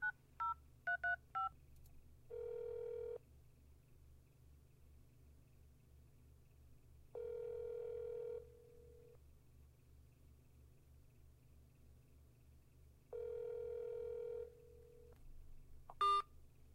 Phone Dial and call
Phone dialing and calling then hanging up
Beep, Call, Dial, Phone, Ringing, Tone